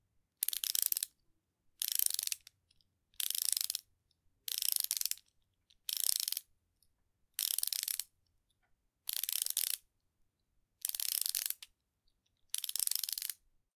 Screwdriver, Ratchet, A
Raw audio of a ratchet screwdriver being twisted without the screw attached. I originally recorded this for use in a musical theatre piece.
An example of how you might credit is by putting this in the description/credits:
The sound was recorded using a "H1 Zoom recorder" on 23rd April 2017.
ratchet, screwdriver, tool, twisting